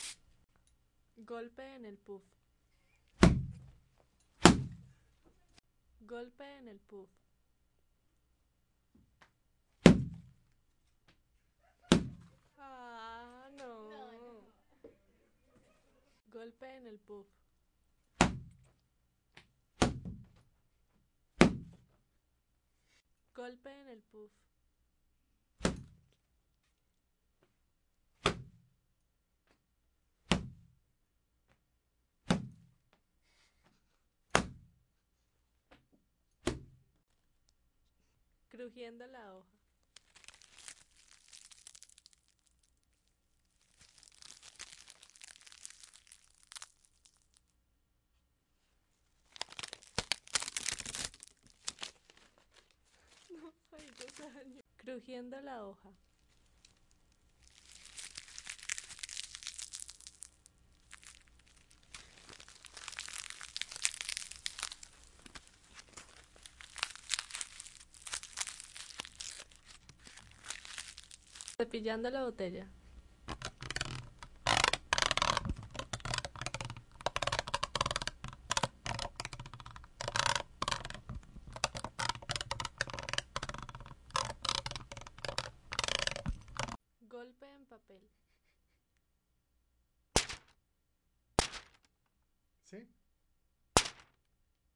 golpe de correa en el puff (4)
puff, correa, golpear con una correa un puff.